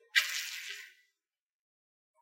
deslizar de madeira
low wood sliding
low, slide, Wood